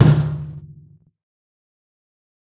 EQ'ed and processed C1000 recording of a good old metal bin. I made various recordings around our workshop with the idea of creating my own industrial drum kit for a production of Frankenstein.